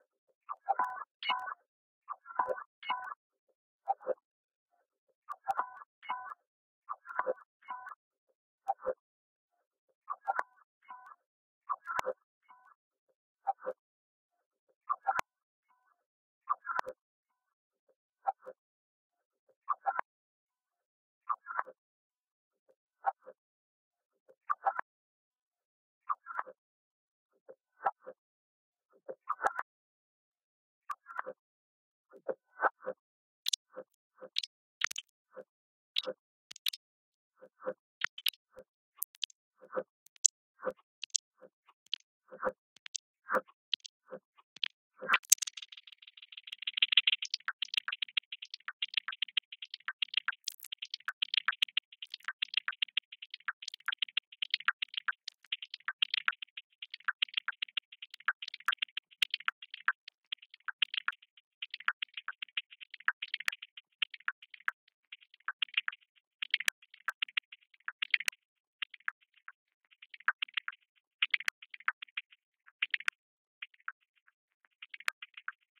One in a series of somewhat similar sounds created by playing some sounds into a few strange delays and other devices.
ambient, delay, echo, filter, glitch, rhythmic, sound-design, synth